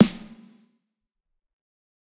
Snare Of God Drier 008
realistic, drum, set, pack, drumset, snare, kit